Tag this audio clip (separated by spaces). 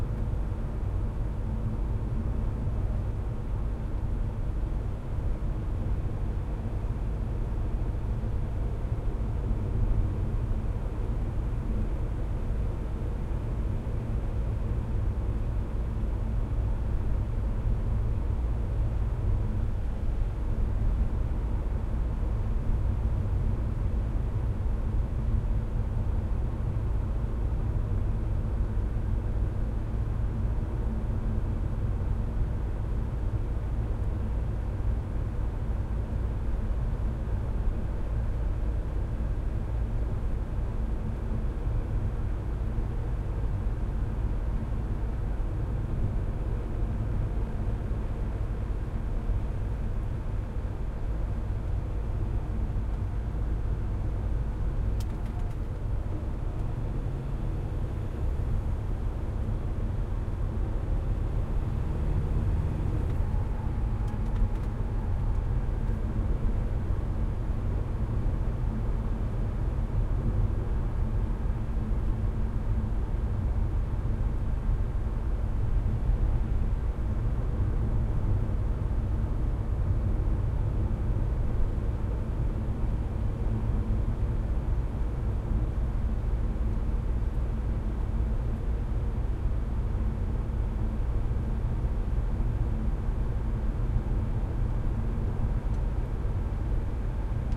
Car Engine Transport Ride Interior Vehicle Travel Nissan Country Driving Micra Inside Road